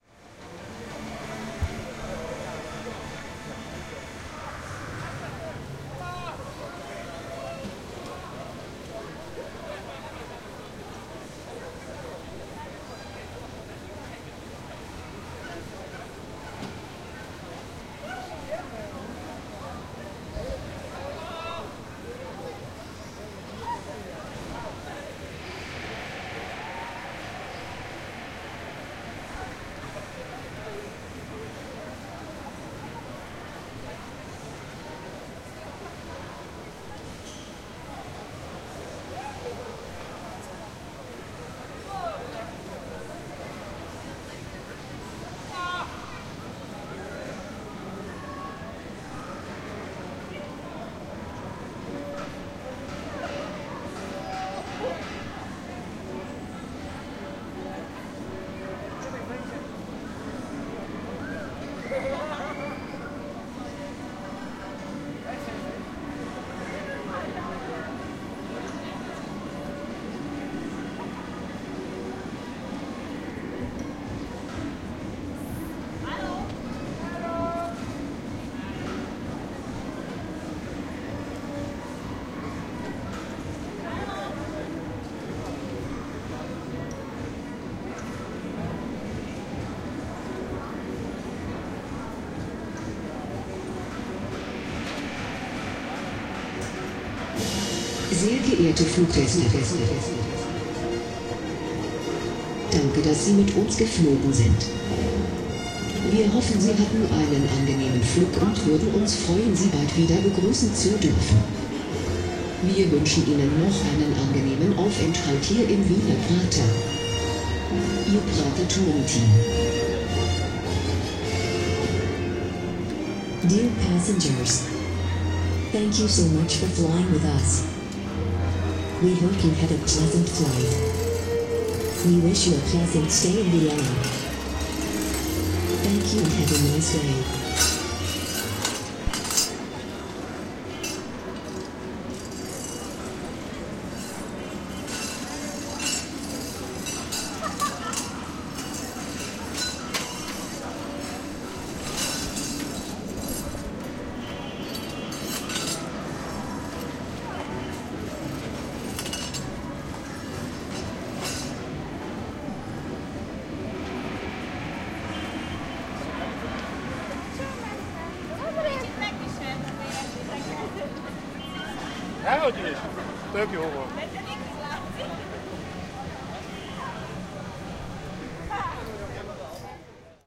Prater 6 Karussell b
Recordings from "Prater" in vienna.
leisure-park, people, prater, field-recording, vienna